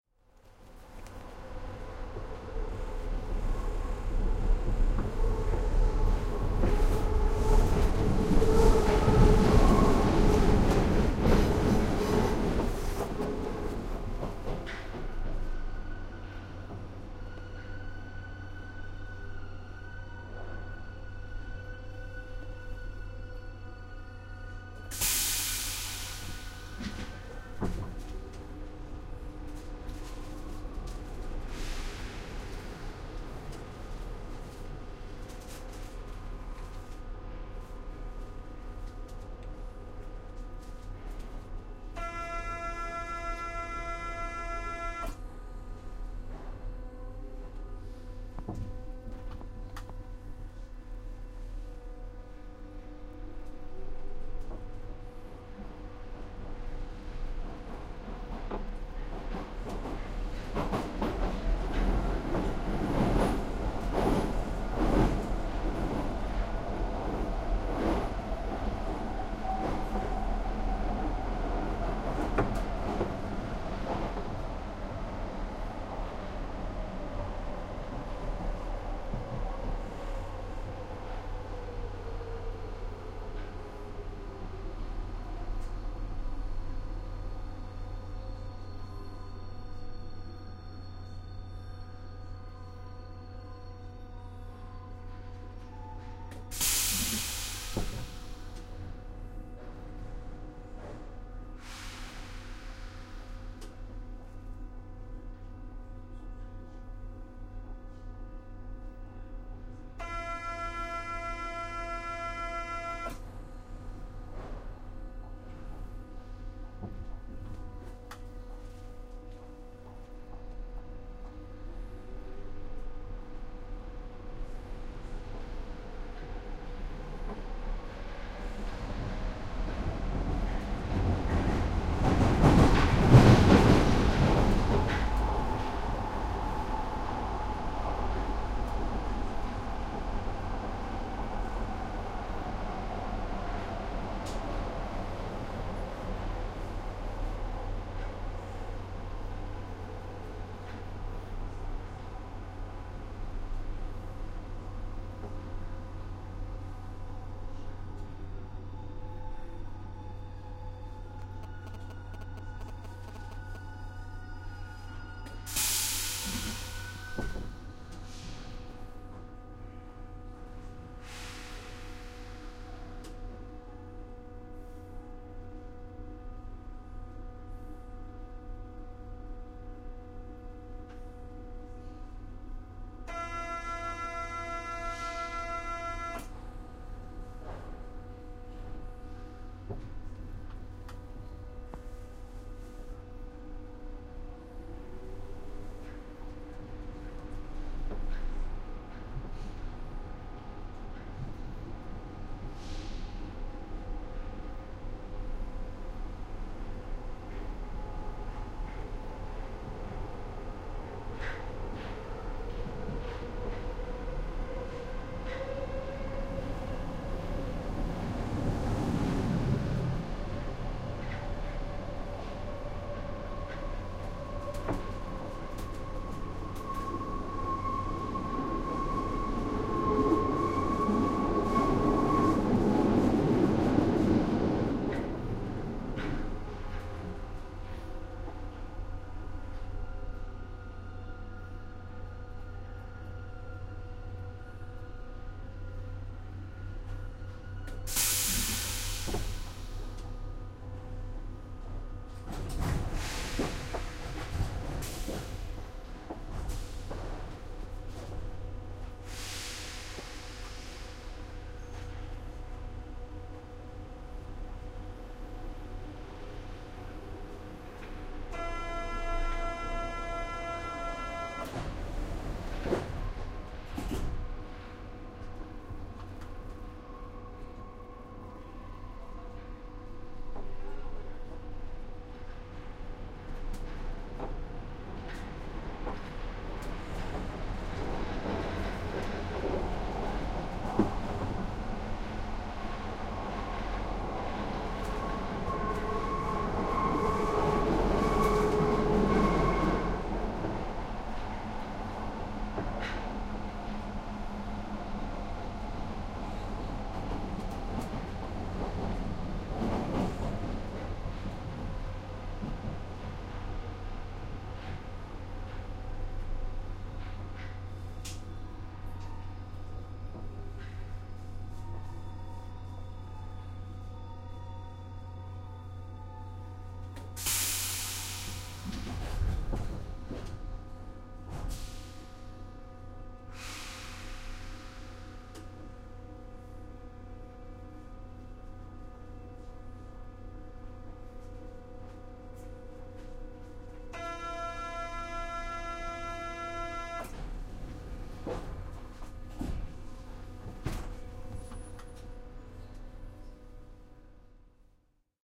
This pack contains recordings that were taken as part of a large project. Part of this involved creating surround sound tracks for diffusion in large autidoria. There was originally no budget to purchase full 5.1 recording gear and, as a result, I improvised with a pair of Sony PCM D50 portable recorders. The recordings come as two stereo files, labelled "Front" and "Rear". They are (in theory) synchronised to one another. This recording was taken in the Paris Metro (Underground).